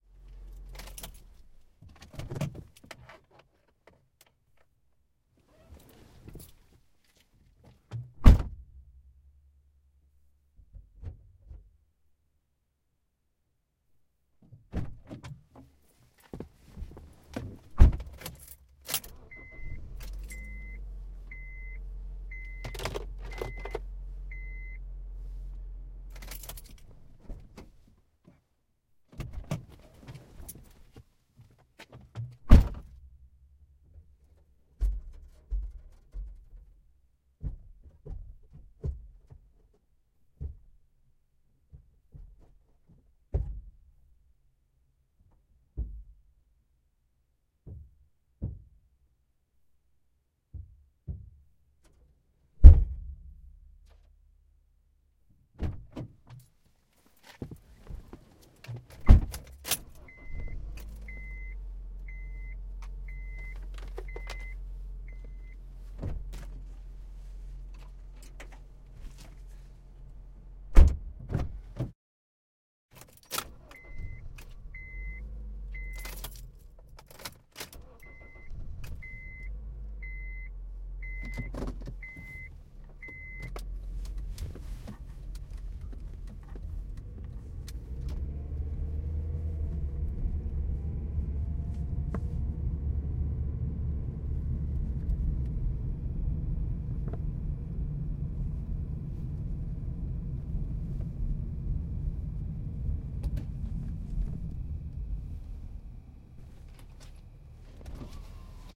LRfrontCar starts doors trunk
interior POV car doors, trunk, car starts Front pair from H2 recording
car, door, driving, engine, Quad, start, trunk